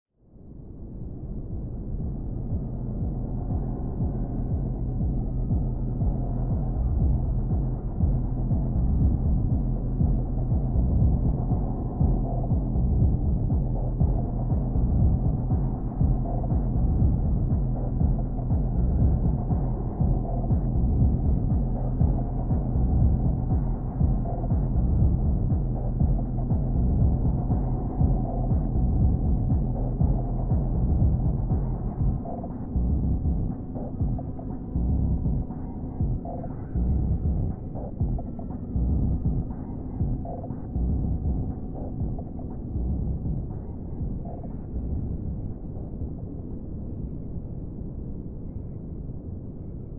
Space ambience: Outside an urban rave, atmospheric. Future city, illegal disco, street-basement. Recorded and mastered through audio software, no factory samples. Made as an experiment into sound design. Recorded in Ireland.
a, alien, ambience, atmosphere, club, cyberpunk, disco, drone, dub-step, effect, electro, electronic, film, future-rave, fx, minimalistic, rave, sci-fi, sfx, soundscape, space, synth, urban-rave